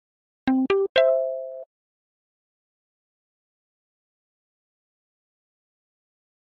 This is a little sound that maybe use to emulate a new message in a phone mobile
mobile, cell, alert, phone